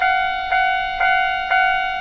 Japanese Railway Level Crossing Electronic Beeping Loop.
Seamless Loop of railway level crossing boom gate electronic bell recorded in Japan.